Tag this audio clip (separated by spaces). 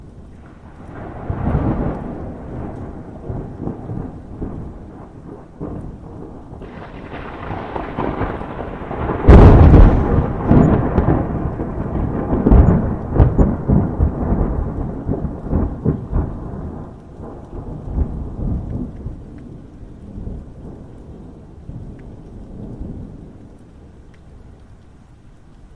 thunder-clap lightning thunder field-recording